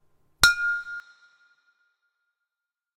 F5note (Glass)
Water glass struck by chopstick. Notes were created by adding and subtracting water. Recorded on Avatone CV-12 into Garageband; compression, EQ and reverb added.
fast-attack, strike, medium-release, clear-note, single-note, Water-glass